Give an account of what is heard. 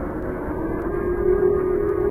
A electronic alarm sound.
alarm-sound, electronic